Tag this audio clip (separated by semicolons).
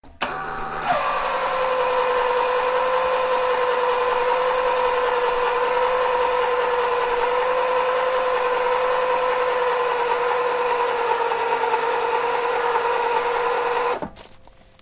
actuator; electric; jack; lift; load; motor; strain; winch